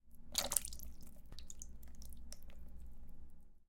agua, gotas, hojas
hojas, agua, gotas